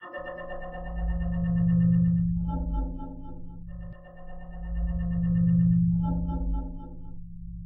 PINCON Pauline 2015 2016 submarine
It's synthetic material. I had, first of all, want to realize noises of gulls. But as one goes along, my approach in changed and this spirit of submarine spaceship came to me.
To arrive at this result I generated a sound of 1000hz then in the course of its reading I varied it with 200hz. Afterward I slowed down at the most the speed, used just a little of réverb. Cut the sound in two to play with the overlapping. I then changed the tempo both so that two different noises get on. To end I added some echo.
Typologie selon Schaeffer:
Continu Complexe
Son cannelés.
Timbre: Terne, synthétique.
Grain: Tendance sinusoïdale mais non lisse.
Pas de vibrato.
Dynamique: Douce et graduelle.
Variation serpentine
Site
mystery, paranormal, sci-fi, soundeffect, space, spaceship, submarine, underwater